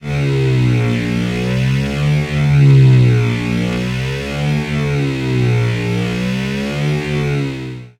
Sound created using Madrona Labs Kaivo and resampled in Ableton Live
Hard, Lead, Modeling, Synth